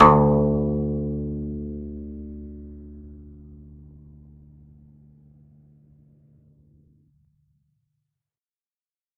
single string plucked medium-loud with finger, allowed to decay. this is string 2 of 23, pitch D2 (73 Hz).
acoustic, flickr, guzheng, kayageum, kayagum, koto, pluck, string, zheng, zither